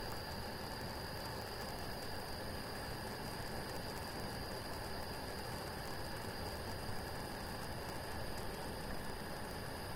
Empty stove on high
cook, cooking, food, frying, kitchen, oil, pan, pot, sizzle, sizzling